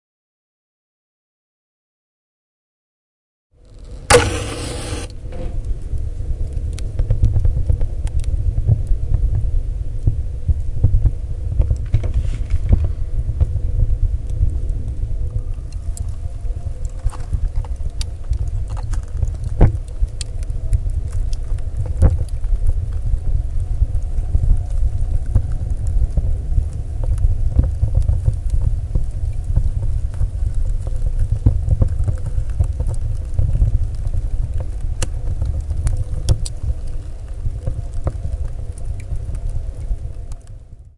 striking a match. a fire pill set on fire and then also a paper. everything into an iron heater, with heavy resonance. Recorded with Minidisc, stereo electret microphone and portable preamp.
competition, fire, heater, match